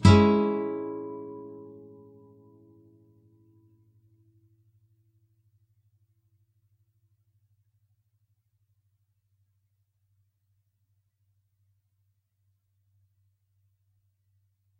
A7th thin strs2
7th, acoustic, chords, clean, guitar, nylon-guitar, open-chords